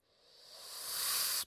Reversed cymbal on a drum set created by mouth.
drum, cymbal, dare-19, music